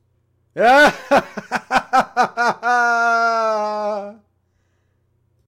Loud male laugh
laugh, Loud, male